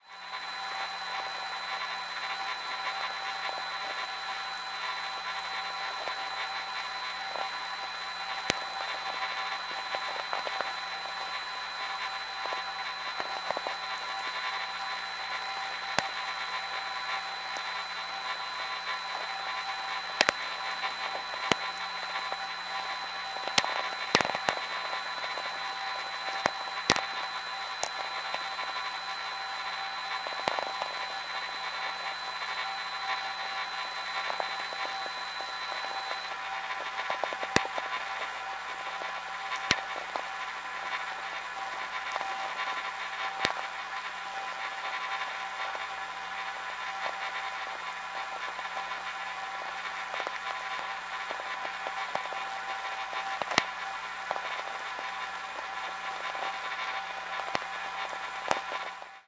inspire 07Dec2007-07:00:01
offers a public continuous source of audible signal in VLF band direct of our ionosphere.
In this pack I have extracted a selection of fragments of a minute of duration recorded at 7:01 AM (Local Time) every day during approximately a month.
If it interests to you listen more of this material you can connect here to stream:
electronic, noise, radio, shortwave, static, vlf